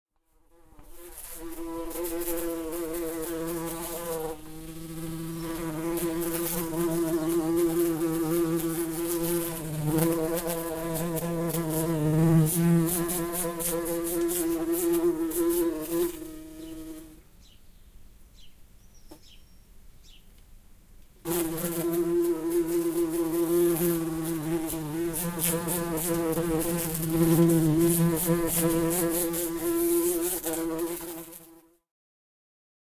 A bumblebee recorded with a Zoom h4n - onboard mics.
field-recording, bee, buzzing, h4n, bumblebee, nature, insect